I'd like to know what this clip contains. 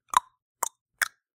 Three short tongue clicks.